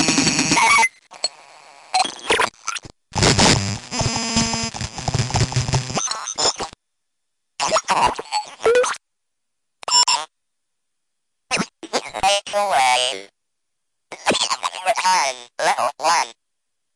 Just some random noise from my glitched speak and maths toy that I made. I created a delay patch using reaktor. Recorded from the headphone socket directly into the live drive on my computer.
maths, speak